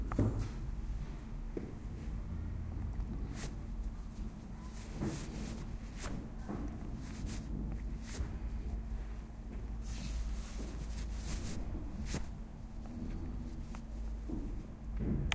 mySound SASP 02
Sounds from objects that are beloved to the participant pupils at the Santa Anna school, Barcelona.
The source of the sounds has to be guessed, enjoy.
cityrings, santa-anna, spain